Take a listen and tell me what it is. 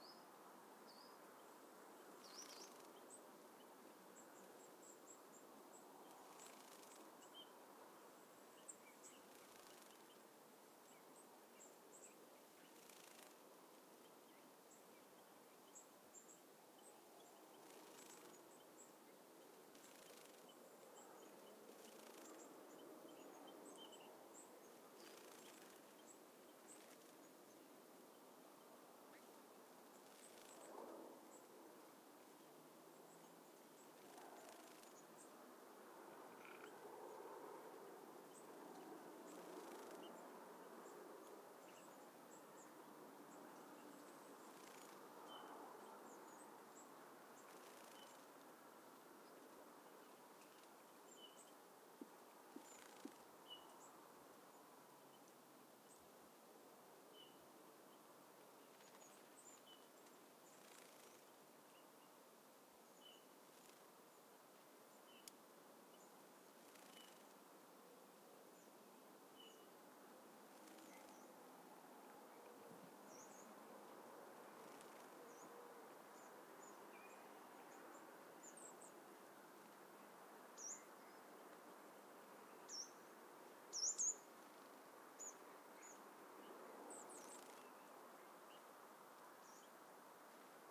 WILDTRACK Coniferous Forest in UK
Wildtrack of coniferous woodland in Somerset, UK. Recorded on a Sennheiser Microphone.
coniferous, daytime, forest, nature, soundscape, trees, UK, wildlife